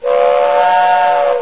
Traditional trainwhistle sound. Recorded at 22khz
trainwhistle, wind